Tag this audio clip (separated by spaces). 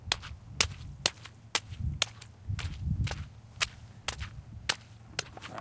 damp foot grass steps walking wet